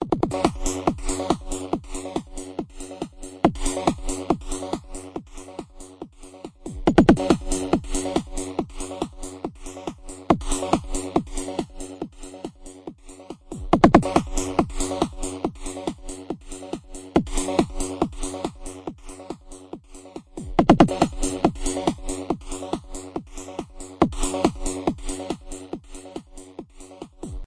epic rave beat by kris klavenes
club, dance, epic-rave-beat, rave, techno